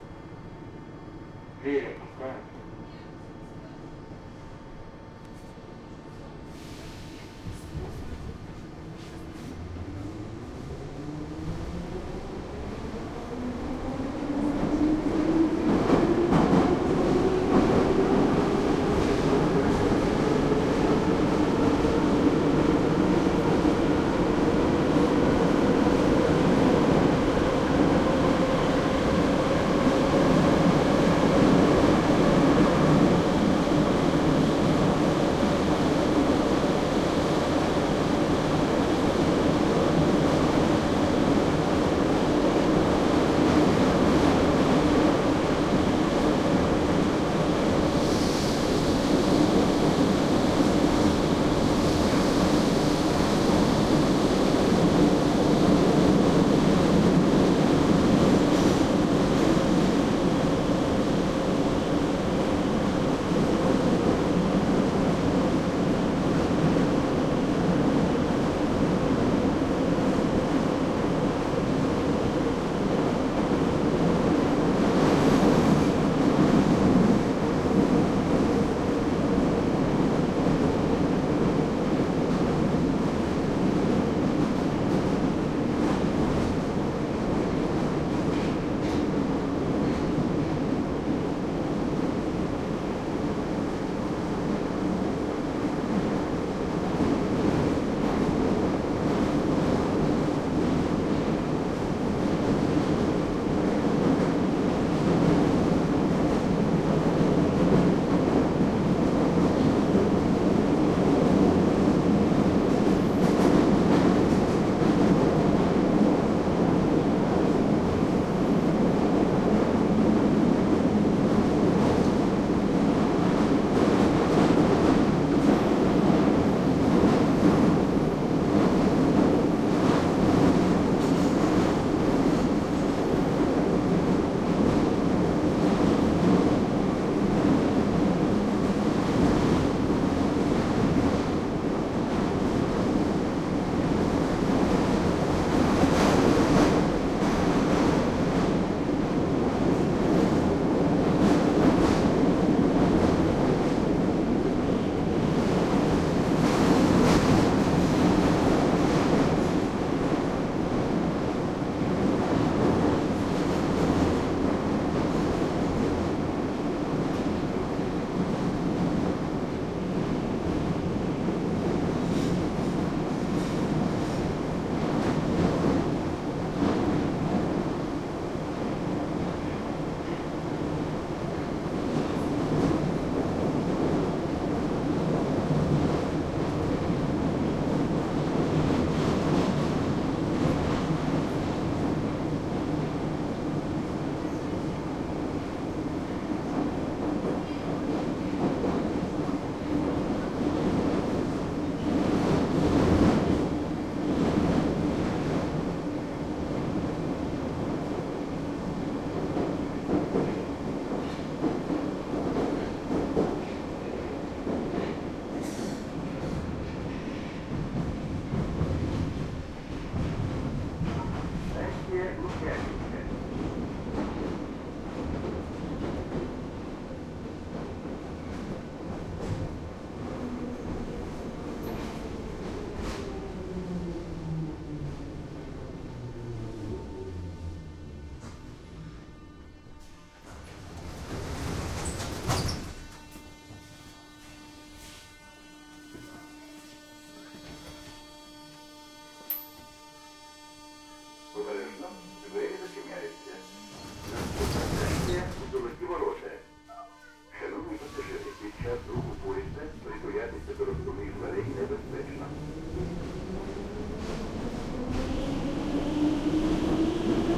Int subway train
Subway train travels from station to station, the atmosphere inside the car. Metro in Kiev, Ukraine.